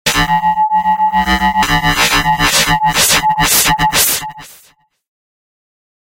Harsh FM World 7
ΑΤΤΕΝΤΙΟΝ: really harsh noises! Lower your volume!
Harsh, metallic, industrial sample, 2 bars long at 120 bpm with a little release, dry. Created with a Yamaha DX-100